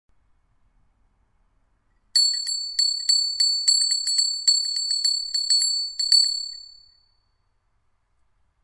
Bell, ringing, ring
Bell, ring